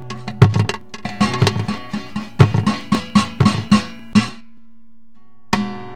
ragga percussion, just like the name. how ironic!
reggea, dub, percs, percussion, ragga